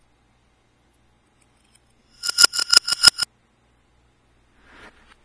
alien signal
i made this by hitting a glass w/ a fork and adding fx.